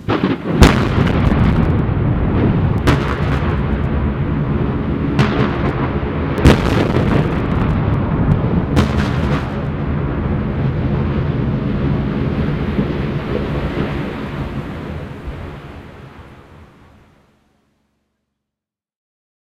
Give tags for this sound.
movie; STRIK; BIG; FX; EXSPLOTION; sound; AIR

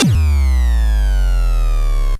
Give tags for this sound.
gameboy; nintendo

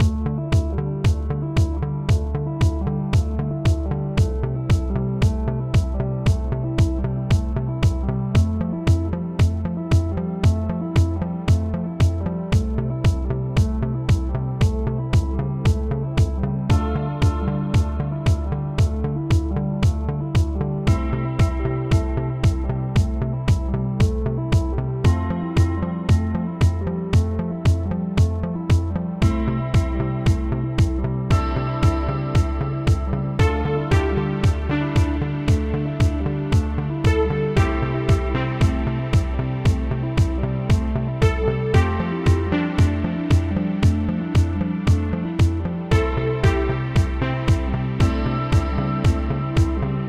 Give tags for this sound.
125bpm; acid; ambient; beat; club; dance; delay; echo; effect; electro; electronic; fx; house; loop; music; noise; pan; panning; rave; record; reverb; sound; stereo; techno; trance

